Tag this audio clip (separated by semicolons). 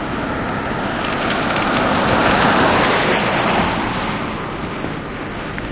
ambience; asphalt; car; cars; city; field-recording; noise; puddle; rain; raindrops; road; street; traffic; wet